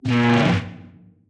chair dragon snort
Processed recordings of dragon a chair across a wooden floor.